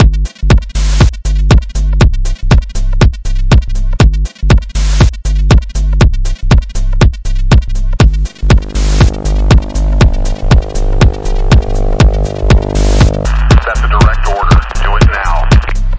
Direct Order 120bpm
Techno beat with voice sample tagged on end. 8 Bars. Do what the man says!